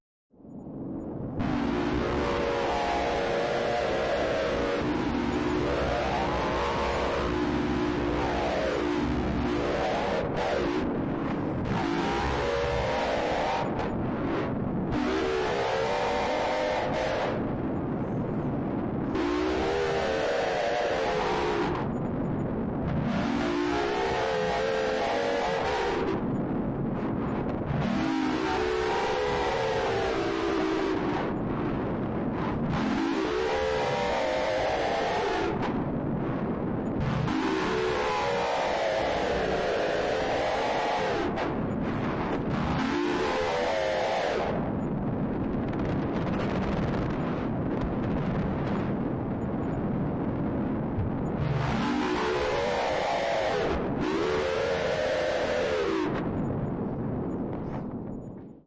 Monster Distortion
monster, sound